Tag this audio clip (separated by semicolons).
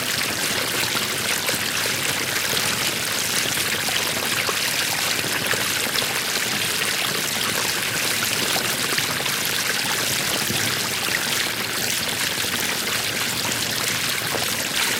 ambient; close; field-recording; loop; nature; pcmd100; pipe; stream; waterfall